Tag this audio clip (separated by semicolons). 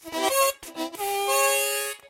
harmonica; chromatic